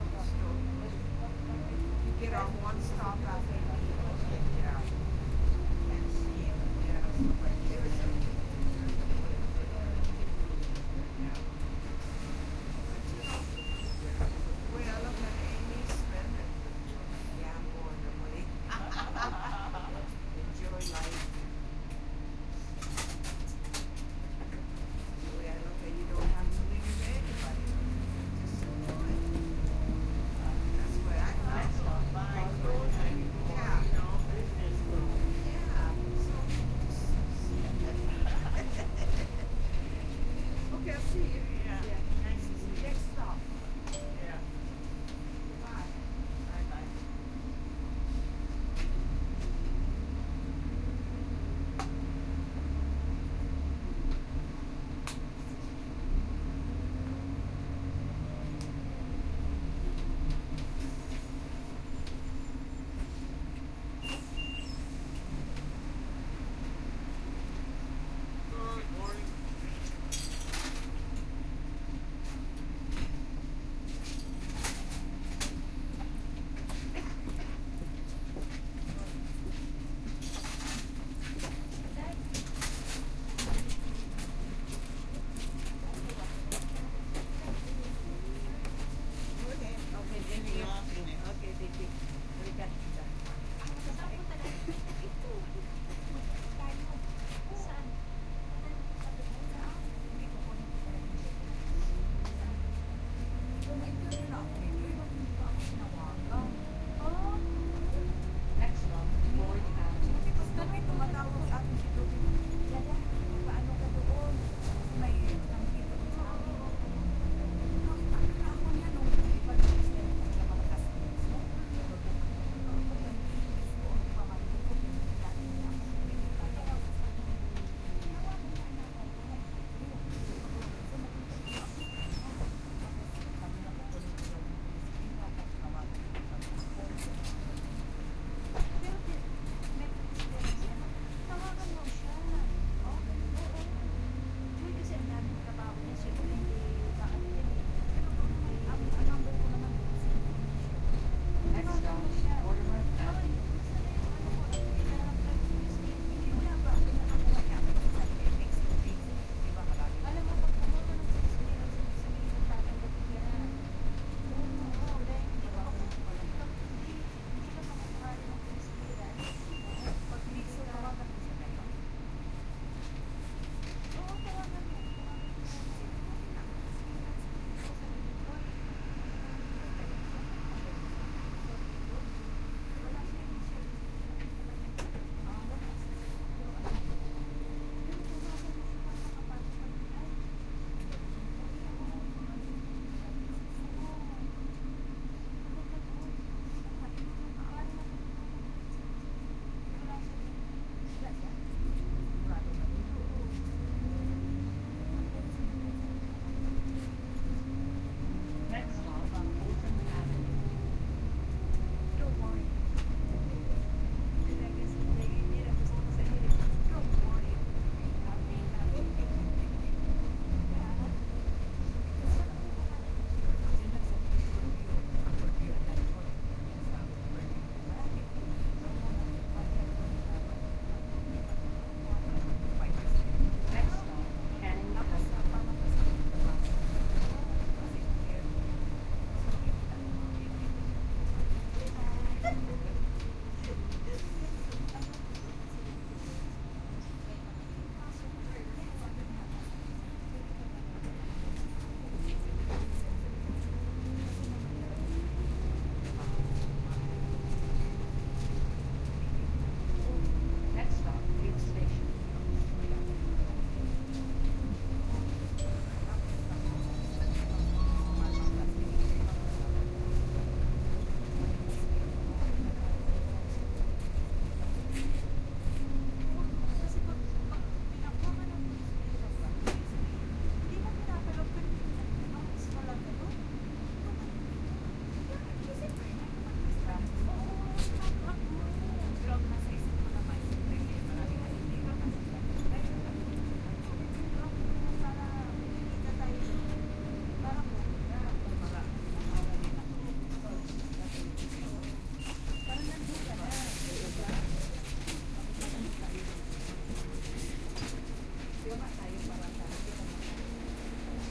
Stereo binaural recording of a subway ride in Toronto, Ontario, Canada.
binaural, bus, field, field-recording, public, recording, toronto, transit, ttc